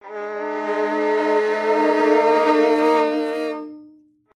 creepy violin
anxiety, anxious, bogey, creepy, dramatic, evil, film, haunted, nightmare, sinister, suspense, terrifying, terror, thrill, violin